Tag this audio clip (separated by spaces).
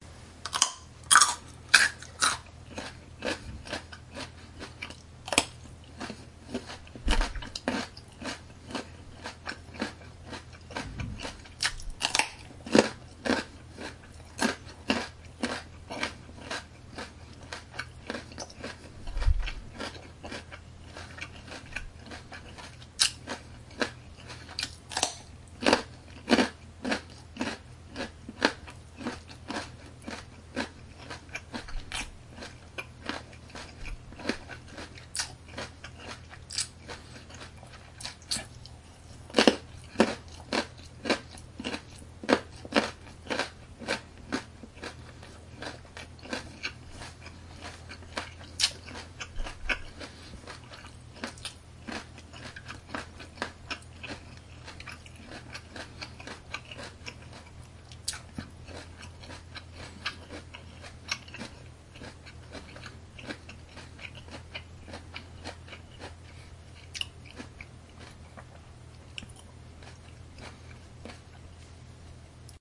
chew snack bite smack crunch sitophilia lips carrot eat